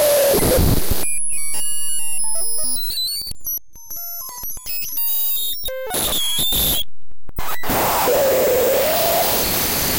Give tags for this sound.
digital
neural-network
random
noise
glitch
lo-fi
harsh

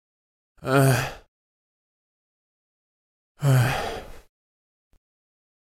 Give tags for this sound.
annoyed
CZ
Czech
male
moan
Pansk
Panska

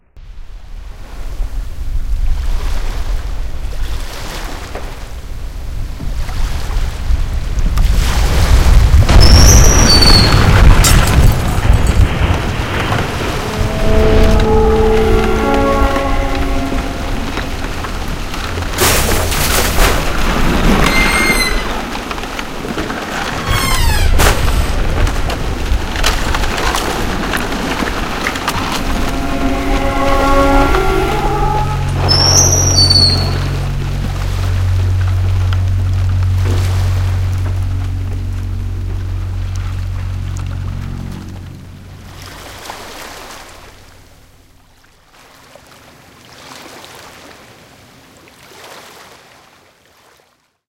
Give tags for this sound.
bang
boat
breaking
collision
crash
dollin
effect
ice
iceberg
matt
noise
ship
sound
titanic

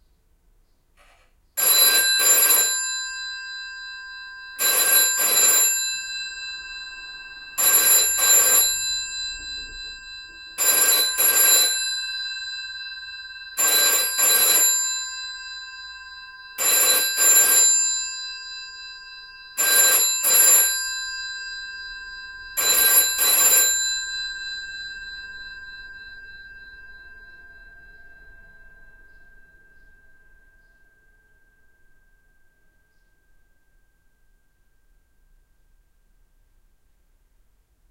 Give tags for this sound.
70s
746
GPO
phone
80s
60s
analogue
telephone
post
retro

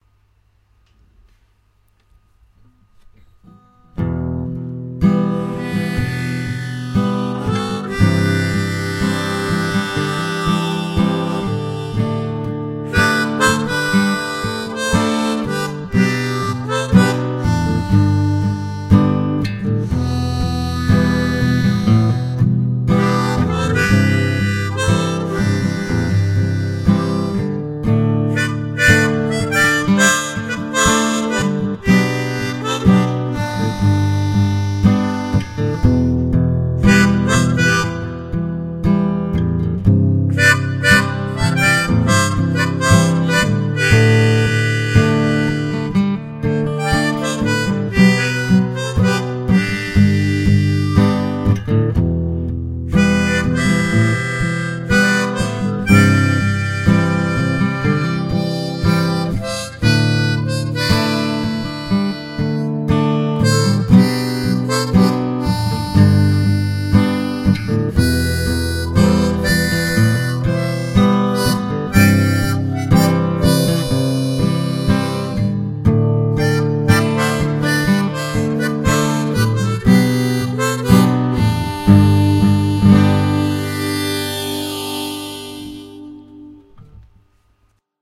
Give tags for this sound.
open-chords
diatonic
acoustic
guitar
chords
Open
clean
harmonica
harp

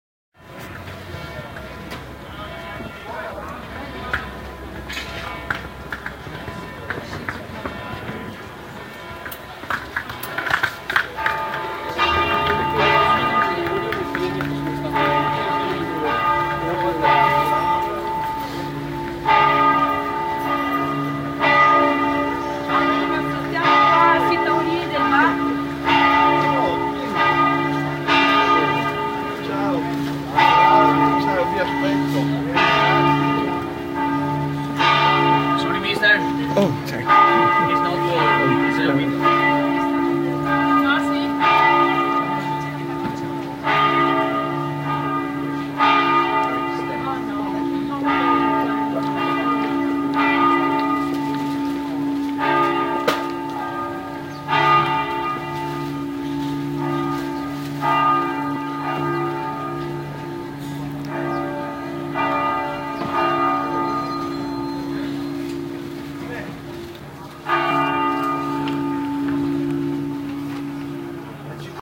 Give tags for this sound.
venice
churchbells
bells
italy
field-recording
italian
city

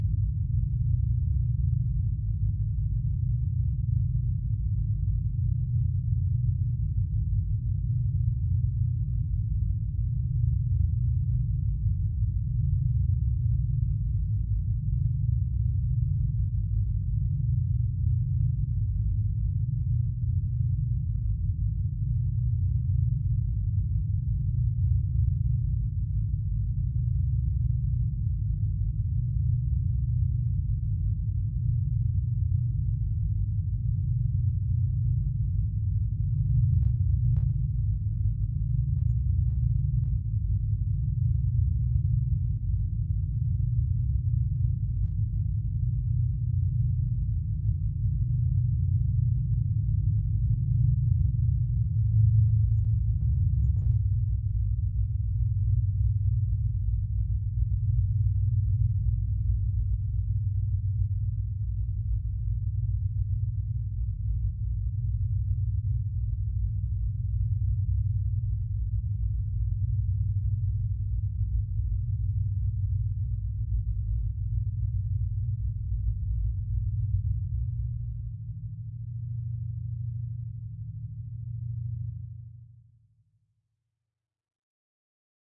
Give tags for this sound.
drone
backround
bogey
roomtone
creepy
menace
terror
ambience
ambient
roomtones
dark
drama
bass
spooky
suspense
anxious

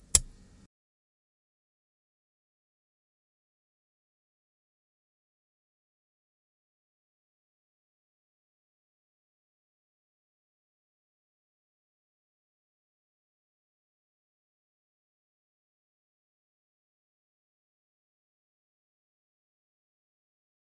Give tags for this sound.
digging
noise
shovel

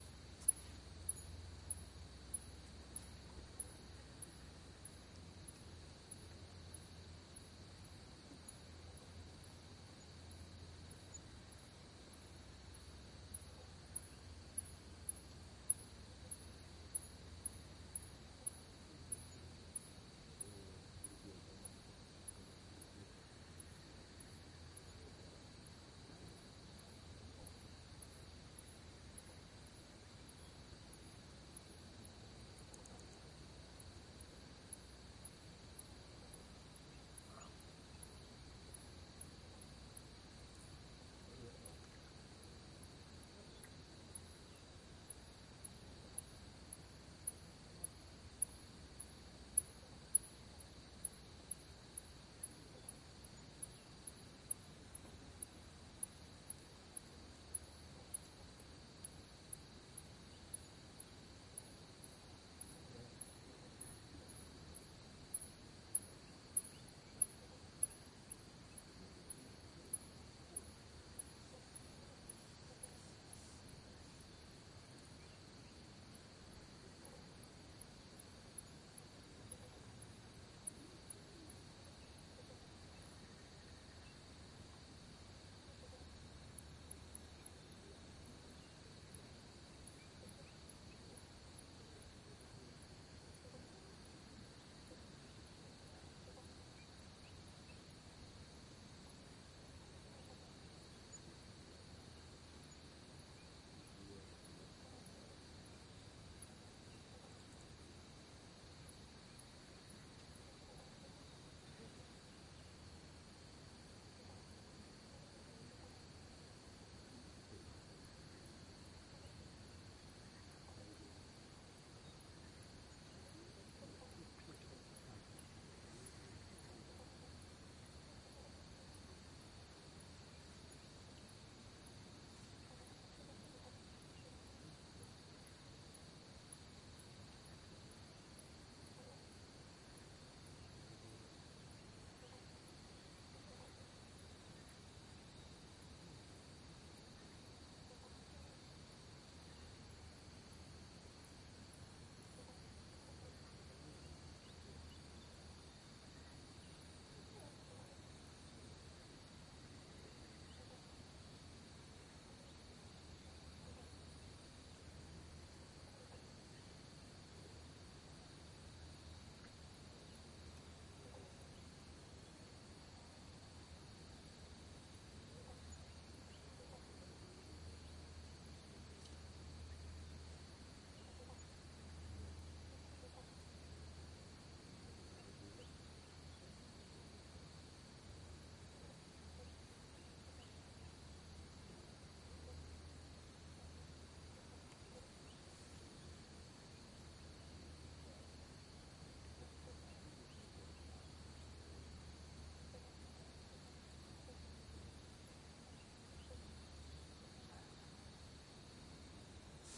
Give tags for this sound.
night
lake
grampians
autralia

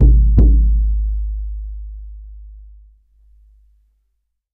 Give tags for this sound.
hand
north-american
aboriginal
indian
percussion
drum
ethnic
first-nations
native
indigenous

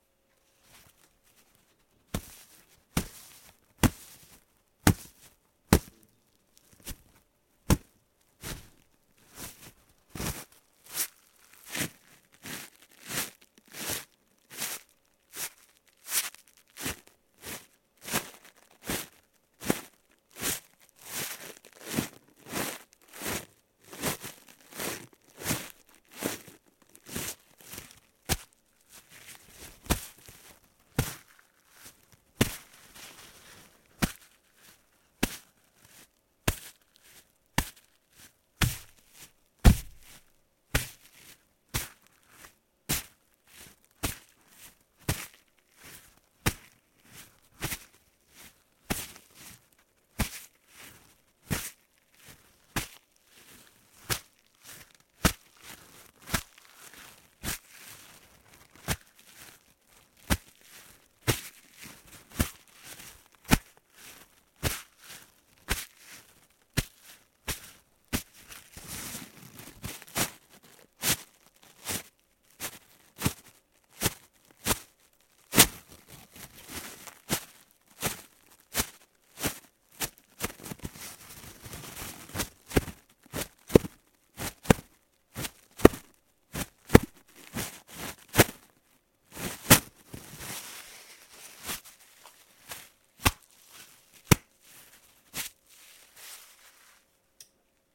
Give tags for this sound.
rub
cloth
noise
sheet
tissue
bash
leaf
paper
grainy
soft
effect
stroke
impact
friction
hit
rubbing
knock
punch
close
granular
rough
bread

